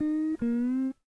Tape Slide Guitar 12
Lo-fi tape samples at your disposal.
slide, guitar, collab-2, lo-fi, mojomills, tape, vintage, Jordan-Mills, lofi